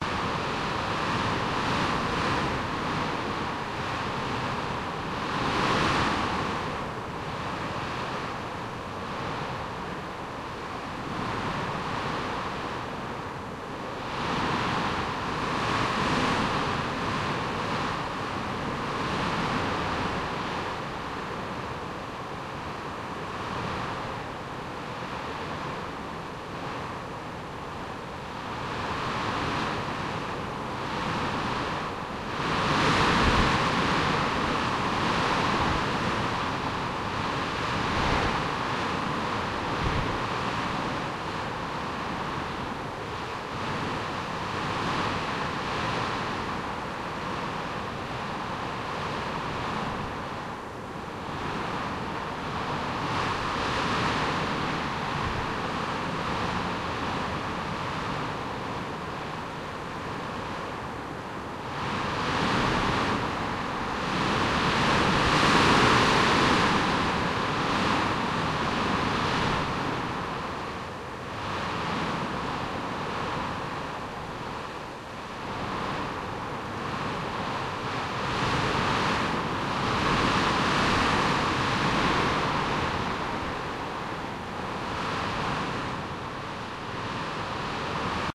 A stormy wind recorded in Scotland.
gusts, storm, wilderness, howling, strong, gale